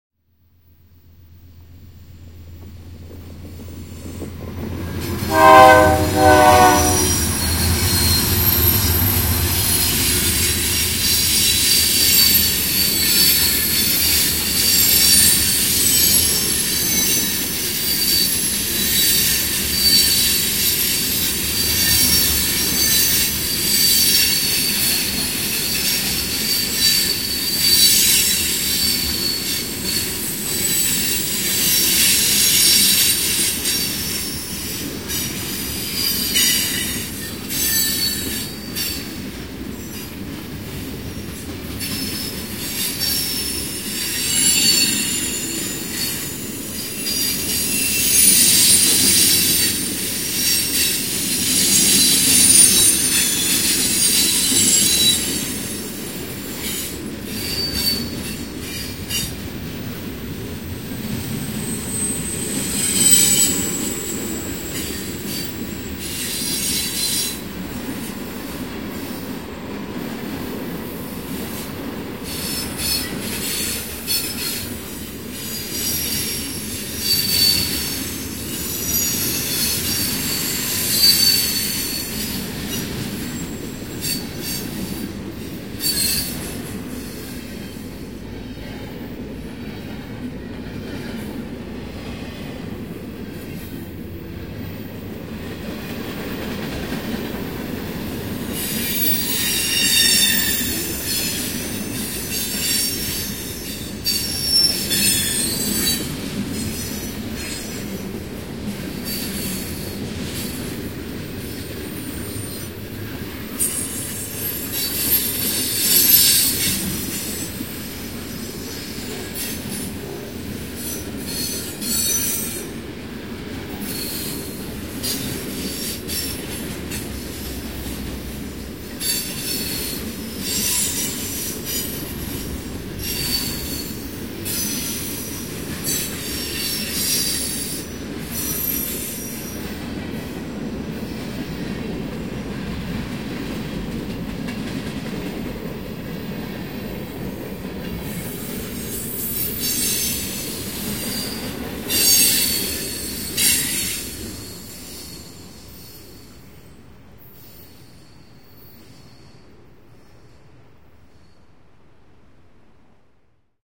train passing2
freight train passing by with horn at start